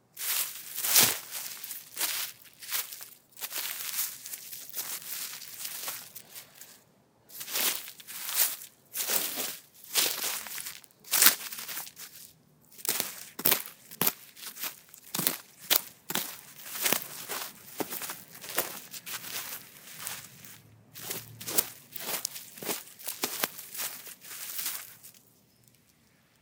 digging through leaves and dense dirt with hands